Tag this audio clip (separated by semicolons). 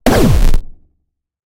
Bang; Fire; Gun; Shot; Light; Rifle; Gunshot; videgame; Shoot; Blaster; Heavy; Loud; Machine; Rikochet; Pulse; Pew; Laser; SciFi